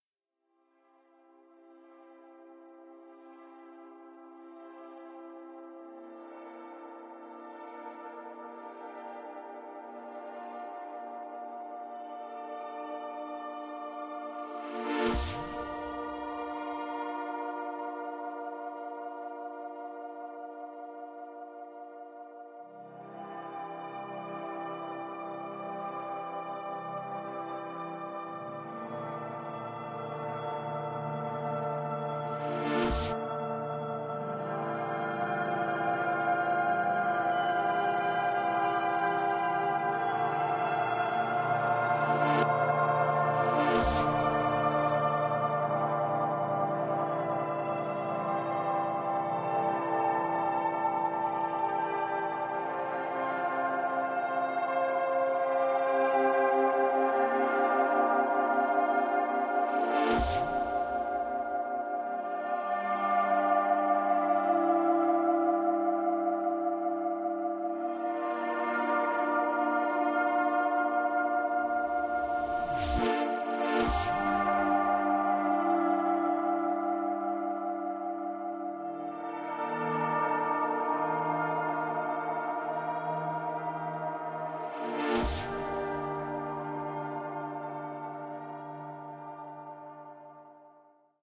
INTRO SDH video background music
I put work by HermanSchmidt and Victorcenusa to chat with each other in this ambient piece having the odd chord accent.
ambient, effect, music, sci-fi, soundscape